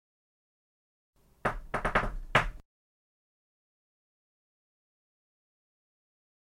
door knock 2
Confident knock on door in the dum diddly dum dum style - appeared in Ad Astral Episode 4 "DREAM GIRL".
diddly, knock, door, dum